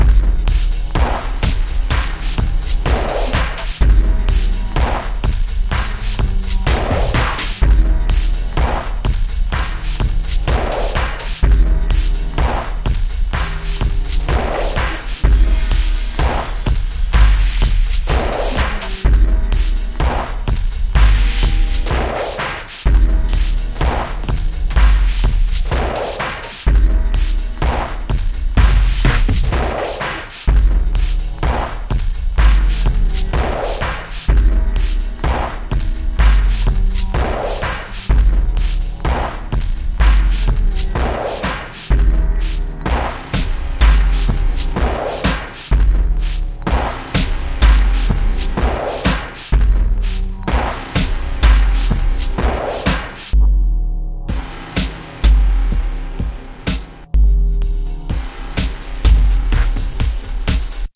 Dirty grinding beat loop
I've been working on a plugin for Unity to allow users to create short loops from arrangements of other loops. Seems to be working pretty well, pretty much like a low-tech version of Acid. Anyways, this was a little arrangement I made as a test, and I kinda like it so I thought I'd post it here for feedback and such.
126bpm, background, break, game, grind, industrial, loop, music, test